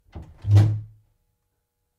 Door Open 3
Wooden Door Open Opening
wooden, door, open, opening